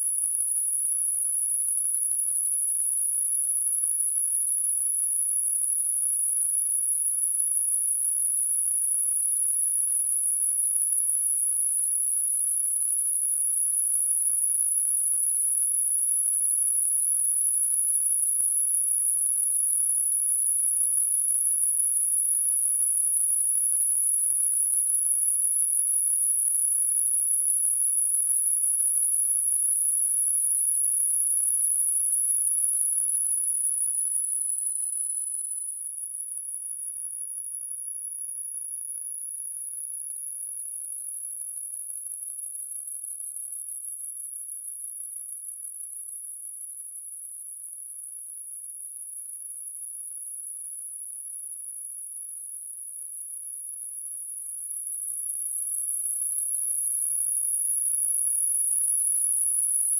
the sample is created out of an image from a place in vienna
image, processed, synthesized, Thalamus-Lab